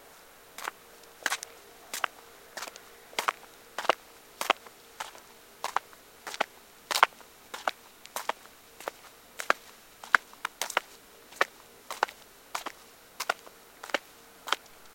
Footsteps walking on the ground